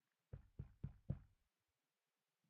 Knock Door 2
knocking on a door
door, knock, knocking, knocking-on-wood